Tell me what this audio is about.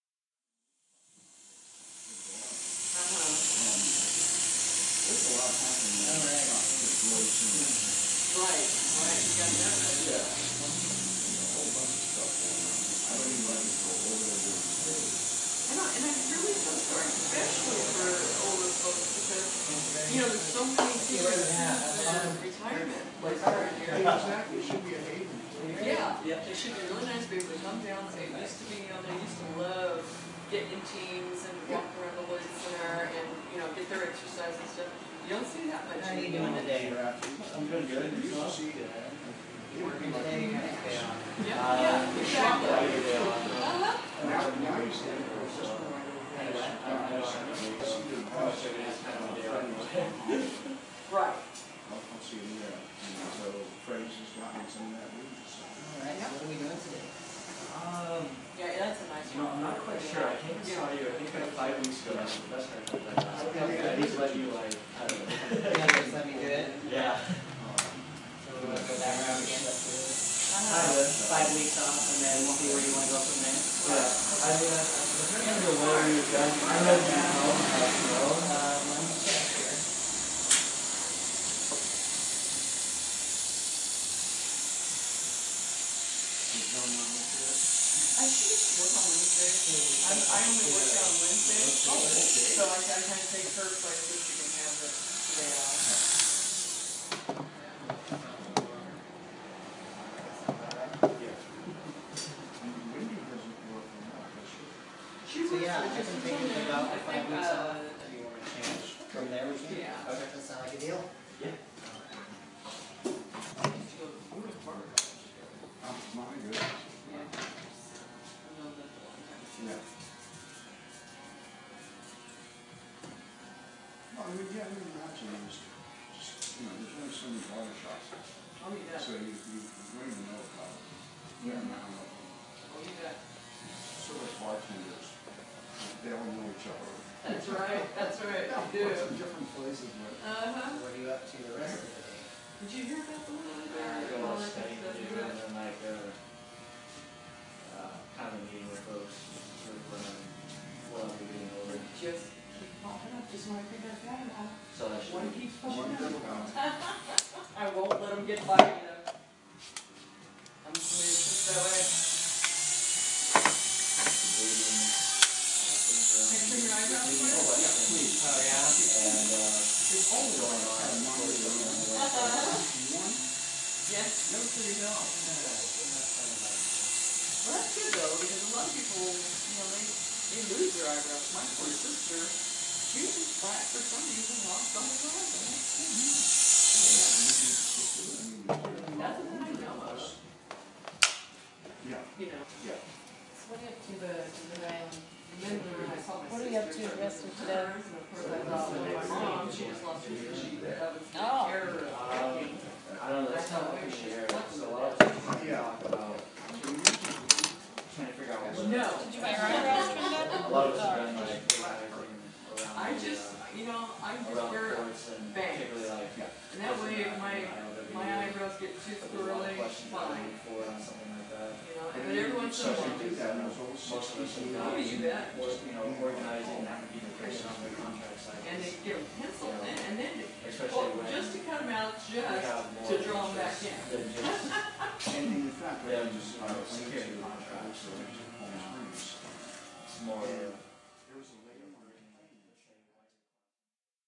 barbershop ambiance-1
What it sounds like in your barbershop when there are several customers getting their hair cut. Listen in and hear snippets of their conversations.